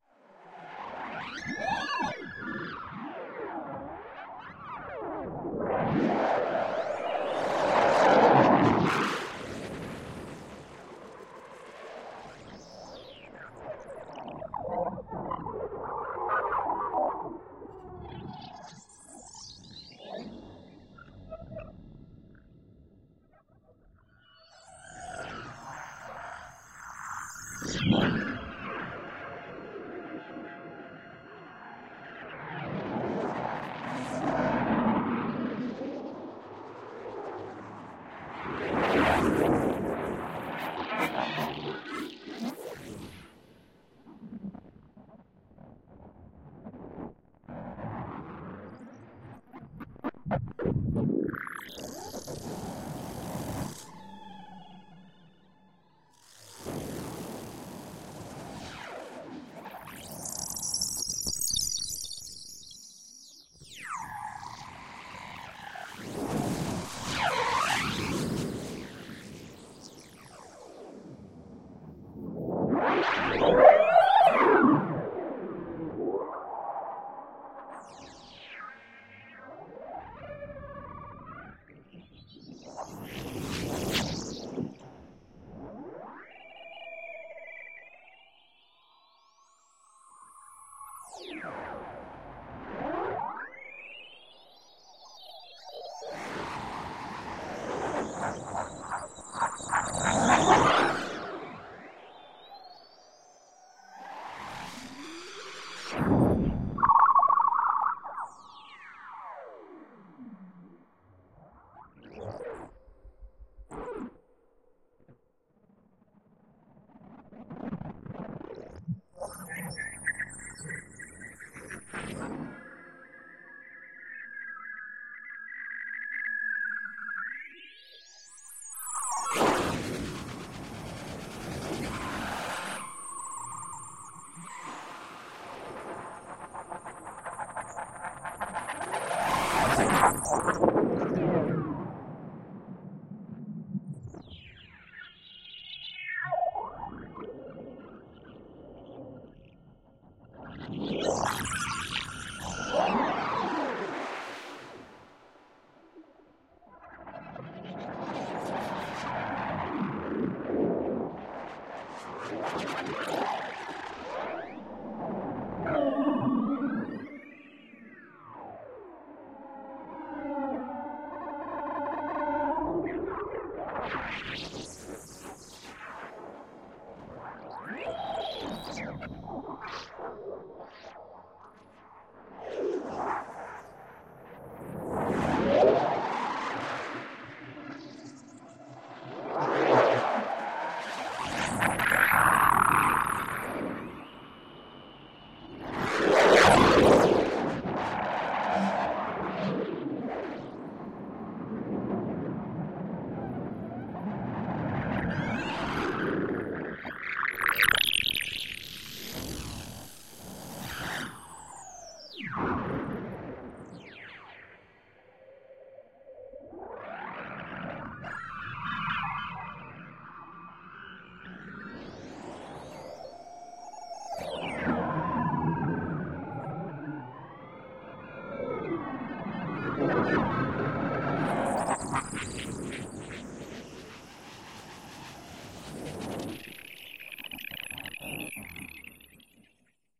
ESERBEZE Granular scape 42
16.This sample is part of the "ESERBEZE Granular scape pack 3" sample pack. 4 minutes of weird granular space ambiance. Space invaders.